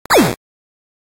A space-ship laser firing sound with a retro-y 8-bit effect.
To make this, I used a synth in Cubase to get the basic laser sound, then exported to Garageband where I applied and tweaked the Bitcrusher effect to give it the 8-bit effect.
laser, spaceship, 8-bit, fire, ship, space, game